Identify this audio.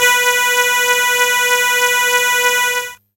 Trumpet C3 CHO
These are the "Instrument" sounding sounds from a broken keyboard. The
name of the file itself explains spot on what is expected.